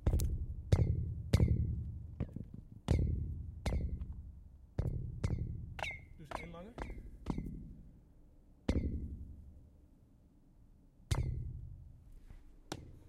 Plastic sewage tube hit 9
Plastic sewage tube hit
Plastic, tube, sewage, hit